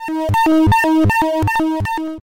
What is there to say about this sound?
Generated in SFXR, this sample resembles an ambulance siren.
sample, SFXR, bit, 8